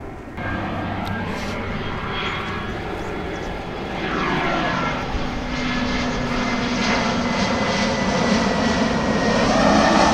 It's a plane flying through the Llobregat delta